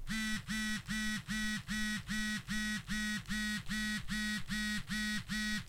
Cell phone vibration - short pulses
Cell phone vibrations, recorded with a Zoom H1.